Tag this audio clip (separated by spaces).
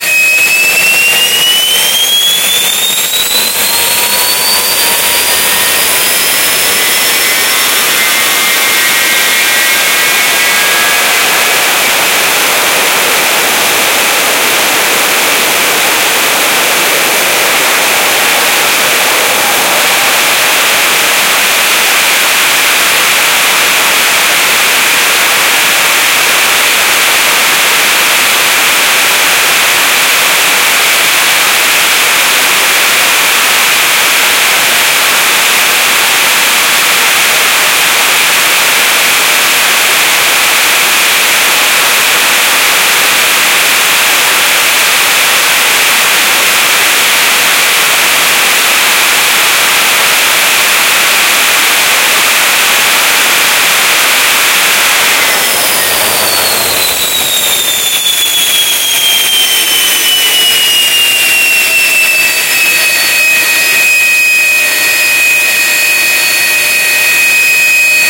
aeroplane airplane army aviation combustion engine fire fuel jet military plane technology